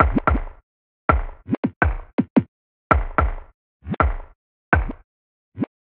165 bpm - Broken Beat - Kick + reverse
This is a small Construction kit - Lightly processed for control and use ... It´s based on these Broken Beat Sounds and Trip Hop Flavour - and a bit Jazzy from the choosen instruments ... 165 bpm - The Drumsamples are from a Roality free Libary ...
Beat, Broken, Construction, Kick, reverse